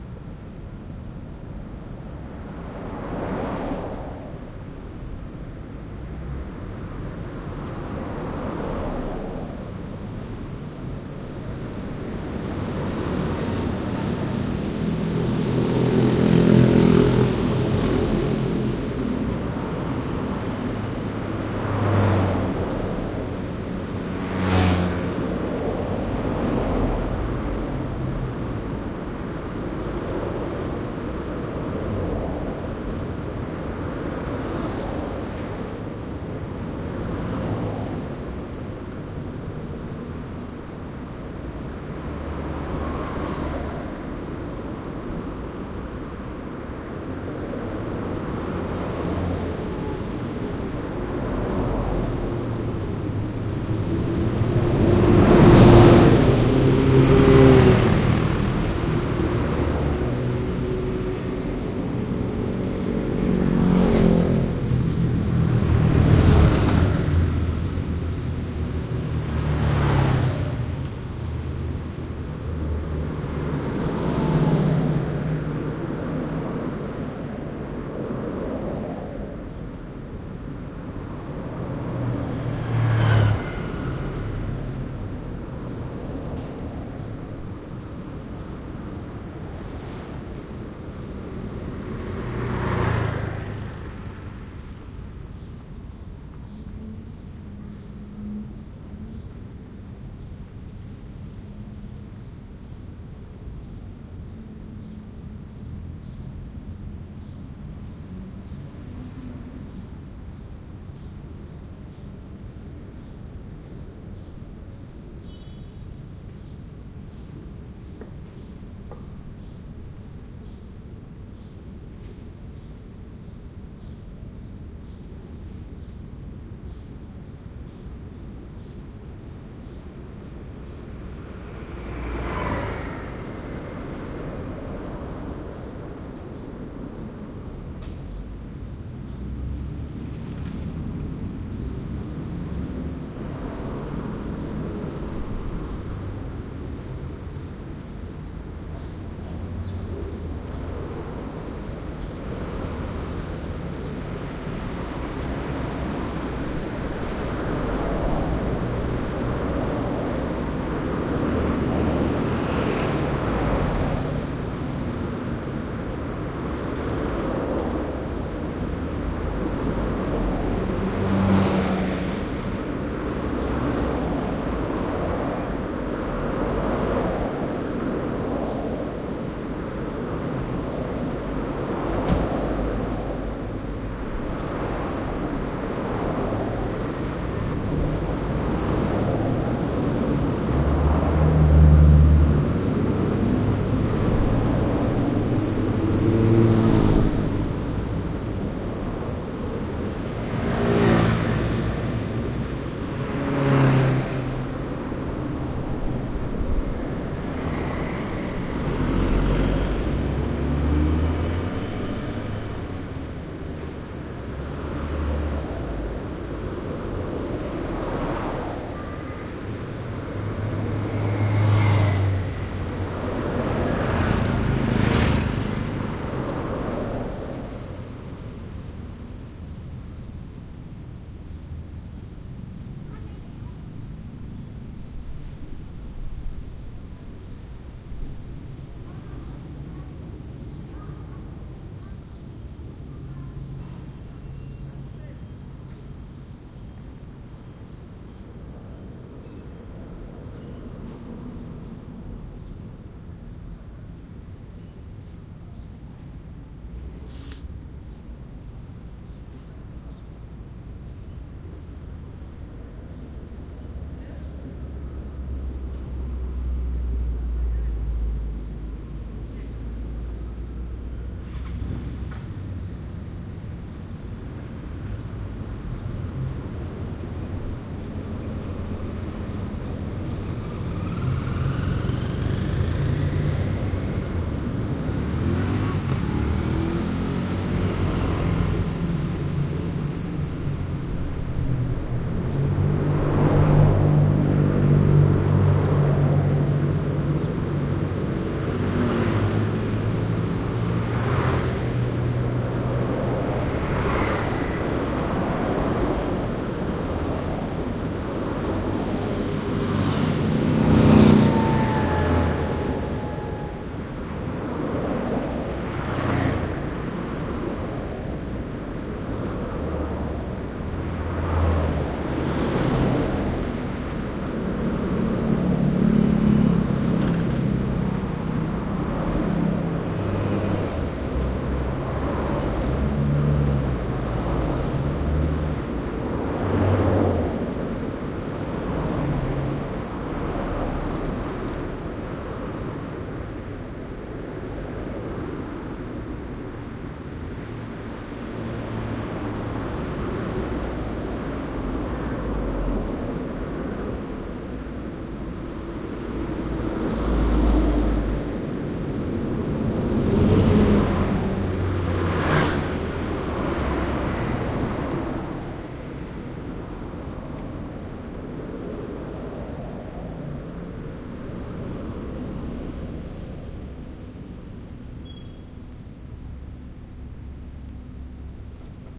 busy tokyo street evening rush 3waves 120
Busy street in Tokyo during the evening rush hour. I placed a pair of mics at 120 degrees between 2 stop lights on a busy street. there are 3 distinct "waves" of traffic let through the lights and all our recorded well. The panning is quite dramatic, so you really get a sense of movement.